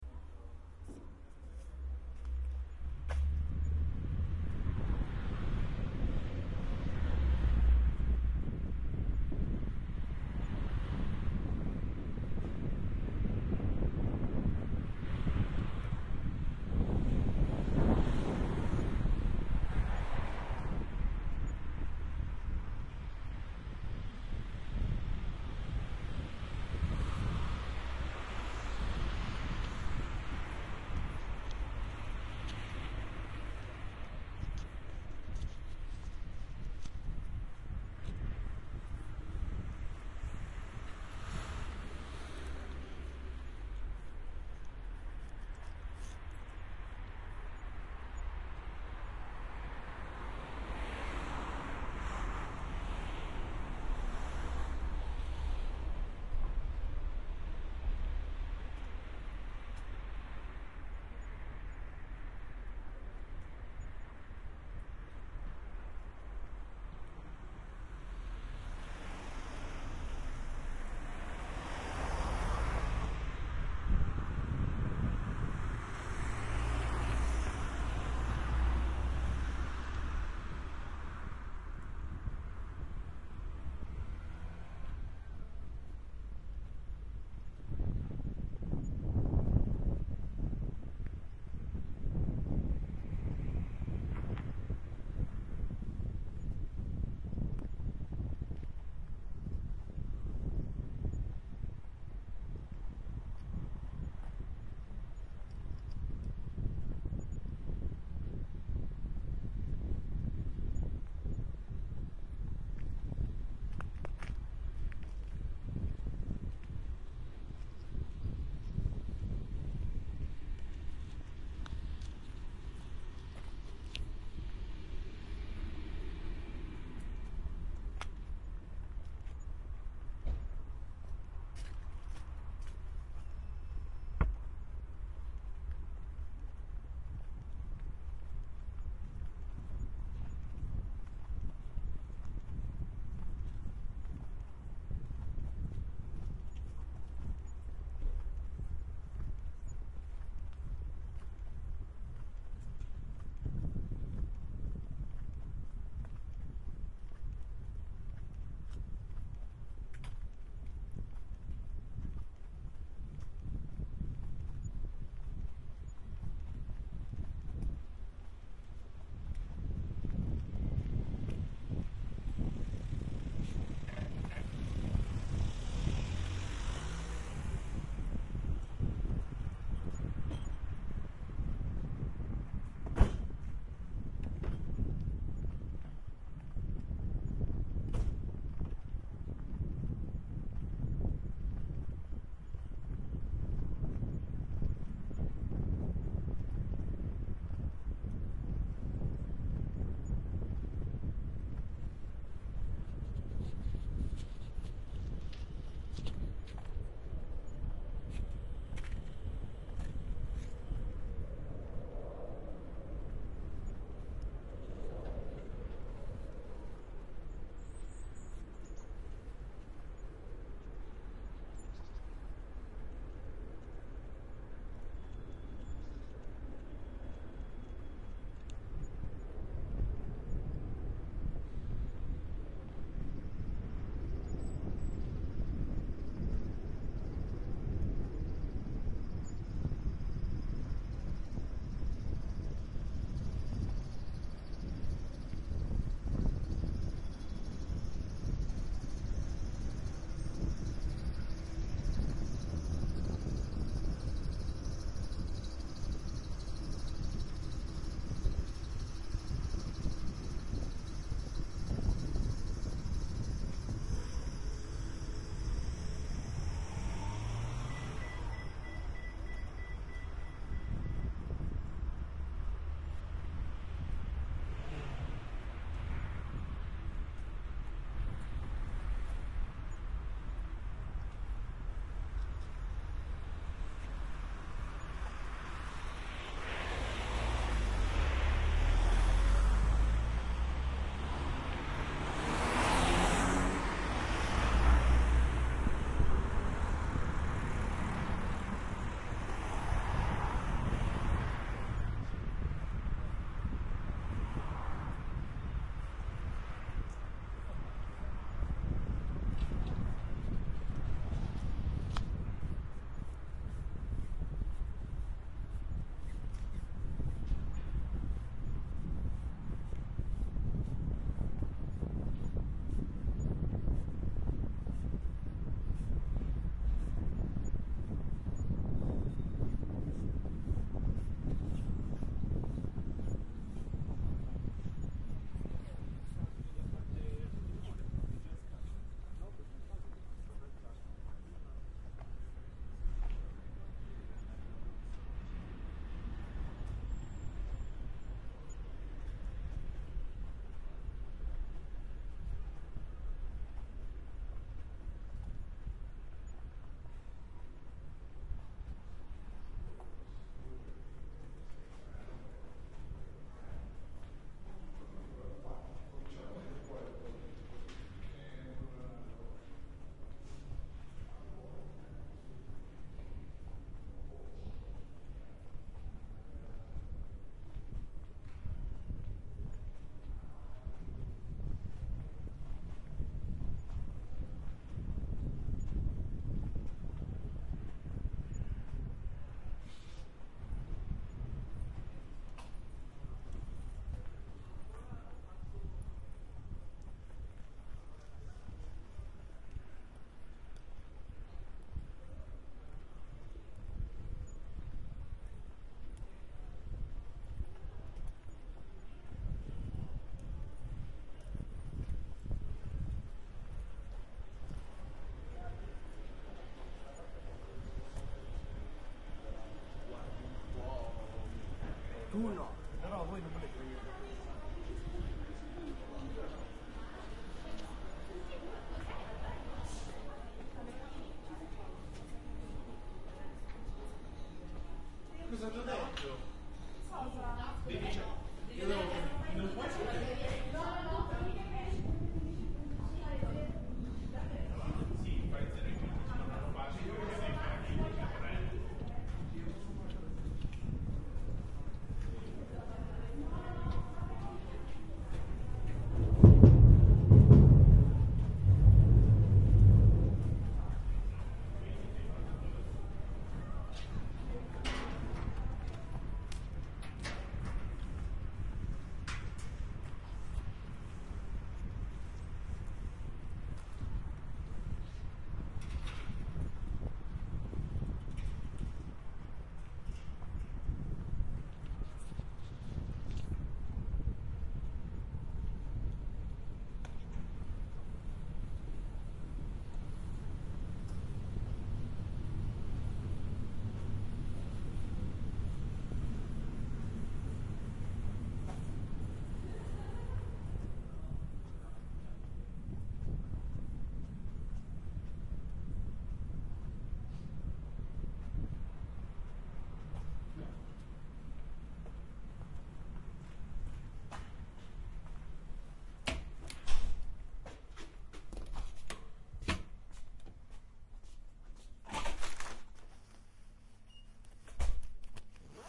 20120208 ore10-24 Seg Poli C
Soundwalk from the administrative building of Politecnico di Milano to the laboratorio di simulazione urbana. 08 Feb 2012 10.24am.
Equipment used: recorder Roland R-05 - Roland CS-10EM Binaural Microphones/Earphones
soundwalk, field-recording, laboratorio-simulazione-urbana